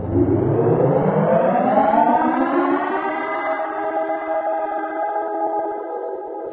Mangled snippet from my "ME 1974" sound. Processed with cool edit 96. Some gliding pitch shifts, paste mixes, reversing, flanging, 3d echos, filtering.
child, human, processed, stereo, voice